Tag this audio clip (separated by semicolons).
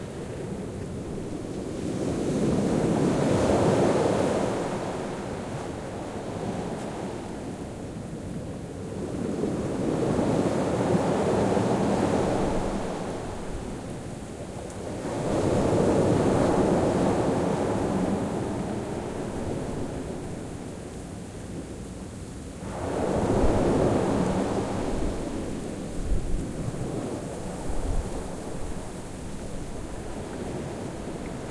ocean; waves; field-recording; spain; coast; beach